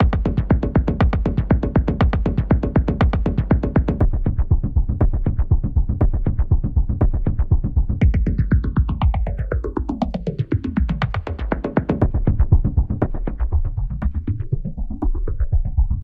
FILTERED DRUMLOOP 3
A filtered drumloop, pitched down 1 octave, Phaser